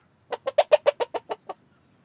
hen clucking. PCM M10 internal mics, recorded near Utiaca, Gran Canaria
chicken, clucking, field-recording, hen, henhouse